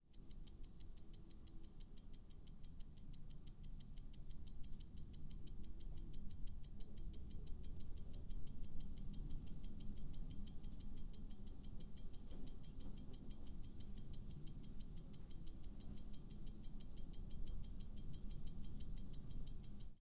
Sound of an old watch, can also but use for wall clock or to create suspense.
I recorded this with a Rode NT-1.